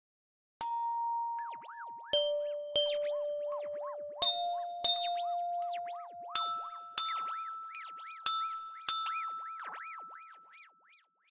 Sad-bells-1-Tanya v
Bells, electronic, for-animation, sound